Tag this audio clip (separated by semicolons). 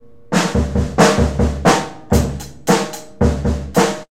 bad-recording; drums; rythm